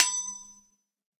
Recording direct to PC back in 1999. Hitting a 6" spackle knife with a wrench or a screwdriver (I forget). Shaking the knife on this one for a tremelo effect.
bell, spackle-knife